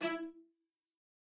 One-shot from Versilian Studios Chamber Orchestra 2: Community Edition sampling project.
Instrument family: Strings
Instrument: Cello Section
Articulation: spiccato
Note: E4
Midi note: 64
Midi velocity (center): 31
Microphone: 2x Rode NT1-A spaced pair, 1 Royer R-101.
Performer: Cristobal Cruz-Garcia, Addy Harris, Parker Ousley